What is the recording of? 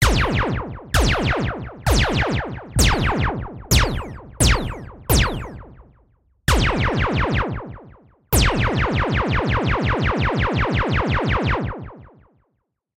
SCI FI GUN
I created sound in Synth1/EQ. Tell me in comments, where used my sound (Please)
animation, blaster, game, gun, movies, sciencefiction, scifi, soundeffects, soundtv, tvseries, videogame